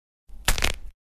Breaking small bone (Finger)
The sound of opening a banana transformed into something that might hurt.
soundtrack, hurt, injury, accident